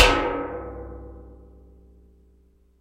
a metal tray is struck with a metal ruler. recorded with a condenser mic. cropped and normalized in ReZound. grouped into resonant (RES), less resonant (lesRES), and least resonant (leaRES).
metal, percussion